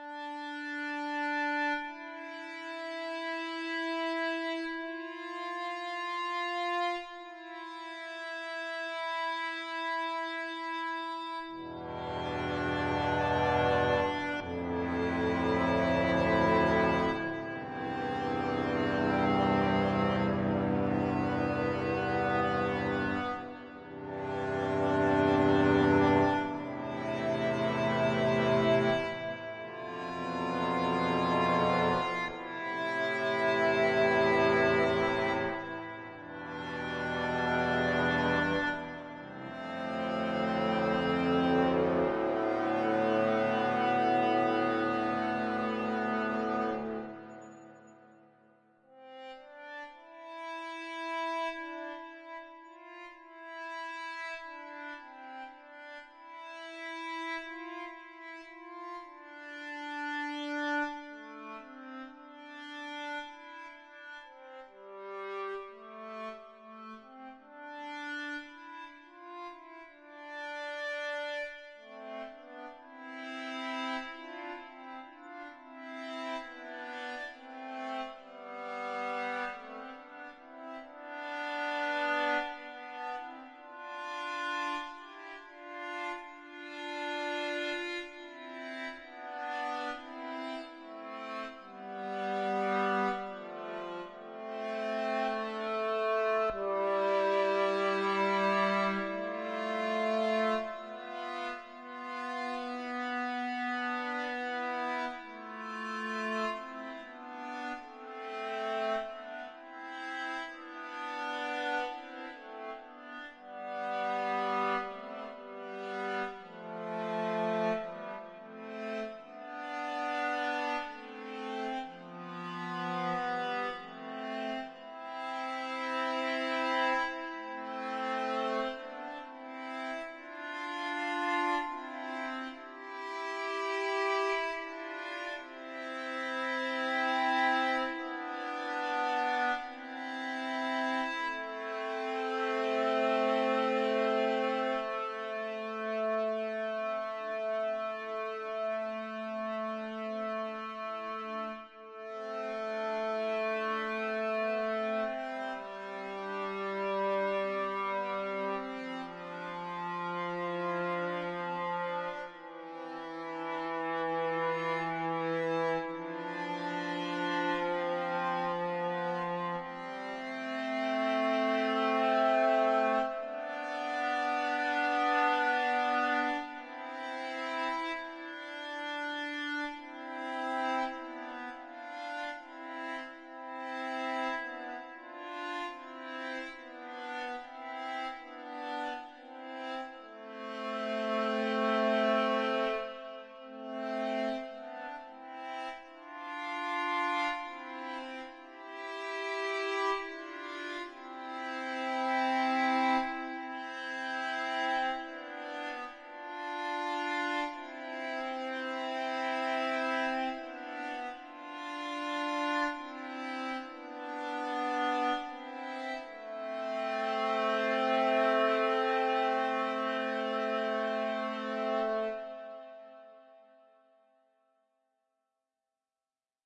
Drama song feito no F.L Studio.
Composição Autoral.